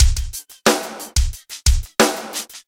3 of 3 variations on drum loop 090 bpm. created on ensoniq eps 16+ sampler.